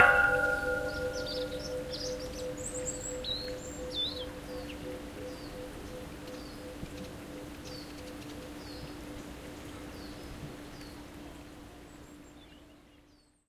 Aluminium pole 1
A recording I made hitting a large metal pole I found in Kielder Forest, Northumberland UK.
Recorded on a Zoom H2N, normalised to -6dBFS with a fade out.
ambient, bird, birds, birds-in-the-background, clang, field-recording, kielder, metal, metallic, nature, pole, sonorous, water